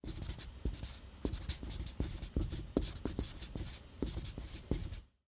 marker-whiteboard-phrase08
Writing on a whiteboard.
marker, writing, expo, scribble, draw, whiteboard, sound